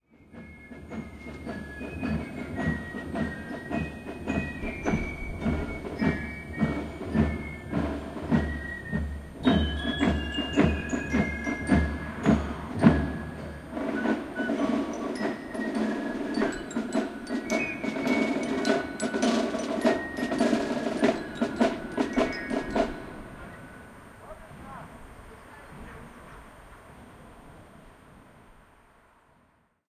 marching band carnival cologne 2
Marching band passing by in front of my apartment during carnival in the city of Cologne, Germany.
cologne, carnival, parade, karneval, marching-band